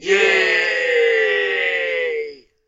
A very enthusiastic crowd cheers "Yay!" at my great idea at F.A.K.E 2015. (Fake Artificial Krappy Expo (for ideas) My idea was so good, it was a bed with spikes! So you don't have to worry about waking up on time! Recorded with a CA desktop microphone I secretly hid. Just kidding. But seriously, this is me sarcastically saying yay and layering it in Audacity. This was an improvement from unimpressed yay. Good for a convention parody, or a commercial parody. "It's a bed... with spikes!" "Yaaaaaaay!"
cheer, cheering, crappy-crowd, crappy-idea, crowd, good-idea-yeah-right, holy-shoot-that-was-bad, not-very-good, sarcasm, sarcastic, that-idea-sucked, WORST-IDEA-EVER, yay, you-suck